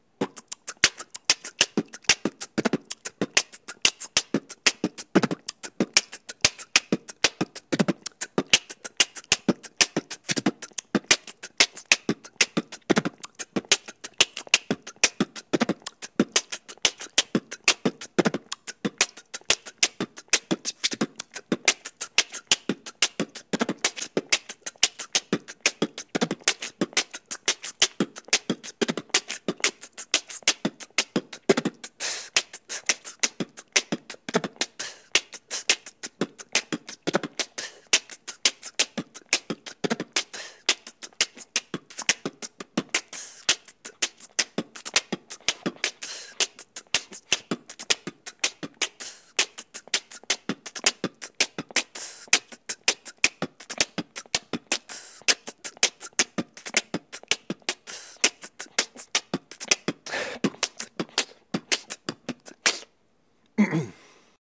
Fastbeat style, or whatever you want to call it. A beatboxing beat - all done with my vocals, no processing.
vocals, Percussion, shotgun, beatboxing, beat, beats, percussive-hit, drums, detroit, drum, hip-hop, fast, male, stereo